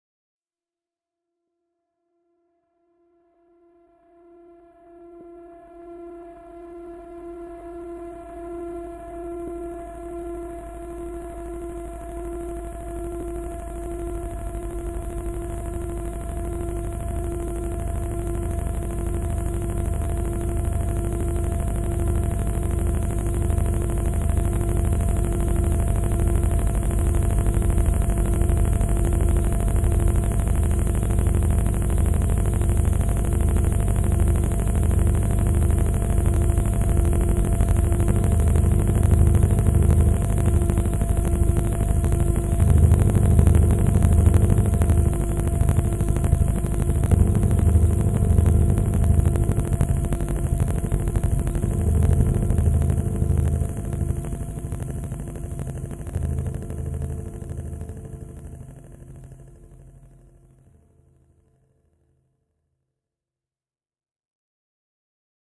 an erroneous glitching overtakes a droning sound of rebuke and admonishment.